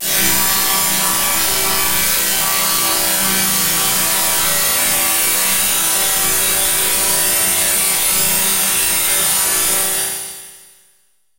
Granulated and comb filtered metallic hit
metal, grain, comb